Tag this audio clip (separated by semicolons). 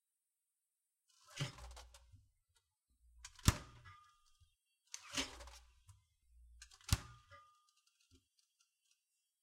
open; refrigerator; door; close